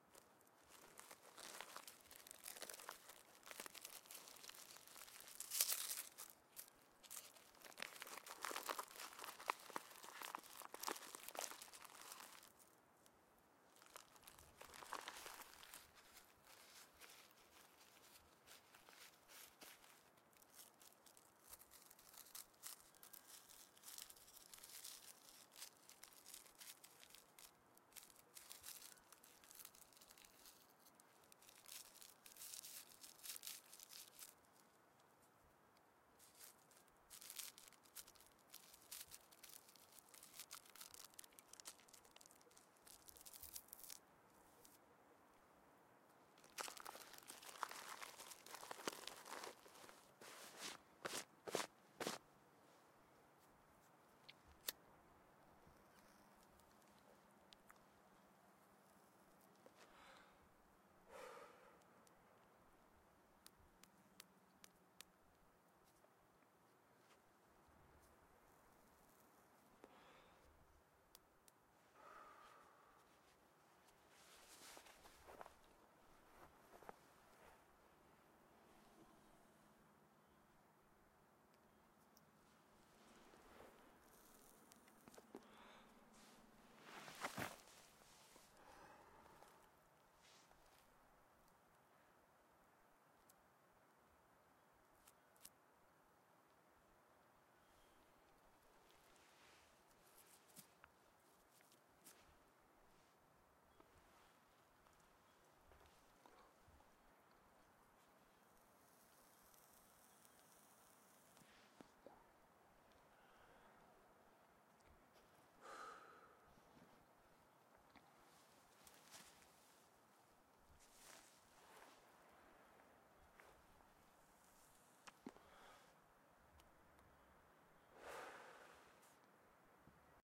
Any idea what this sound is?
Liar-se una cigarreta / Rolling a cigarette
Liant una cigarreta al Pirineu Occidental durant un moment de pausa en un rodatge a la Vall d'Àssua, Pallars Sobirà, l'any 2015.
Tech Specs:
- Sennheiser Shotgun Microphone K6 + ME66
- Zoom H4n